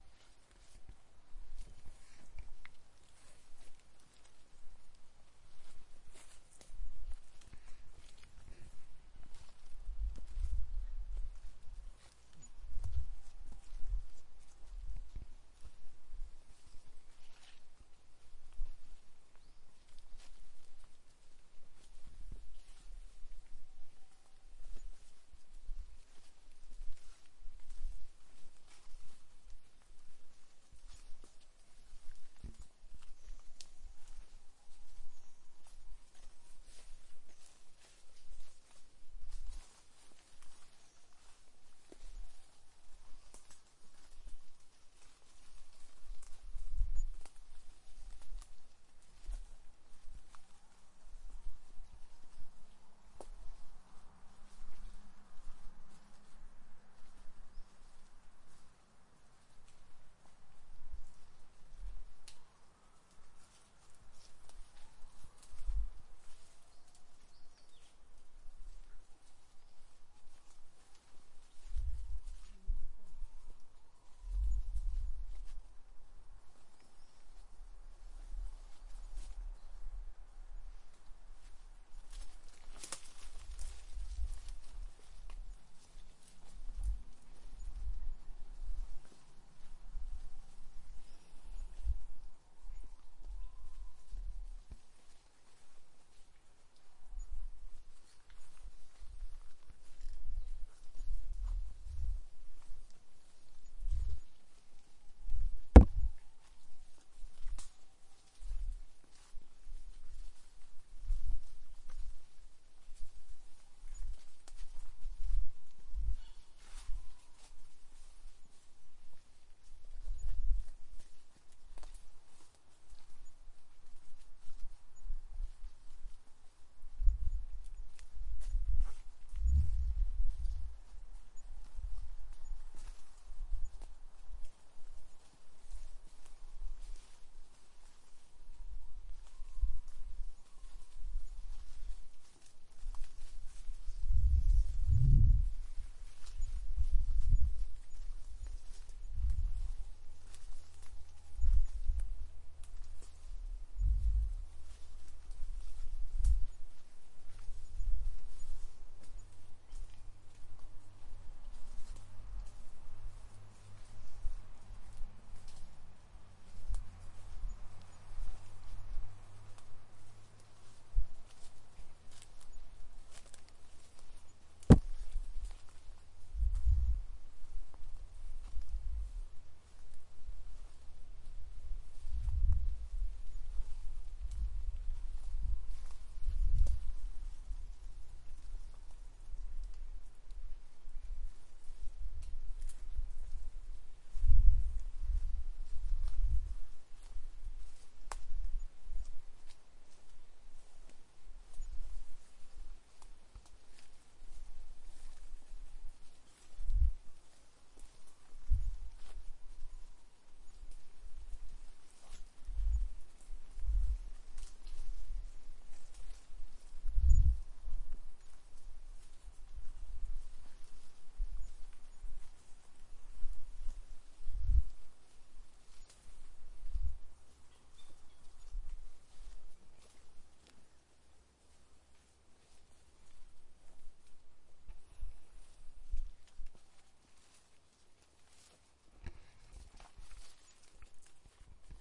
Trekking in Khao Yai National Park, Thailand
Steps in the jungle of Khao Yai National Park.
Recorded the 18/11/2013, at 12:05 pm.
birds, jungle, national, park, trekking